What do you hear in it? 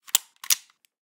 Weapons Gun Small Reload 001
Foley effect for a small pistol or gun being reloaded.